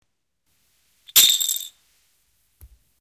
ganar fichas
Deja caer fichas de casino a piso de vidrio de relieve
casino, fichas, plastico